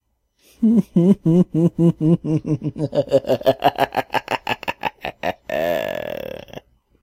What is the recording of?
Evil Laugh 3
More of a cocky laugh then anything